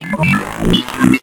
A synthetic voice sound effect useful for a somewhat confused robot to give your game extra depth and awesomeness - perfect for futuristic and sci-fi games.
computer, gamedev, videogame, videogames, artificial, AI, gaming, Speak, Talk, electronic, gamedeveloping, game, games, Vocal, indiedev, futuristic, Voices, sfx, machine, sci-fi, Voice, arcade, indiegamedev, robot